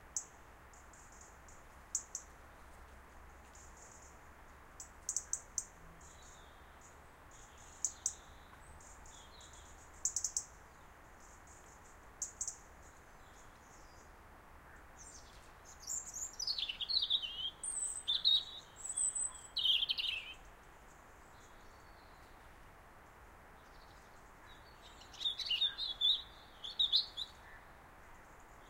Just a short clip of a Robin making different noises and singing a little tune.
Recorded with 2 Sennheiser ME 64/K6 microphones, the beachtek DXA-10 preamplifier, a Sony Dat recorder TCD-D8 with the SBM device.
scotland, stereo, robin, birds, field-recording, bird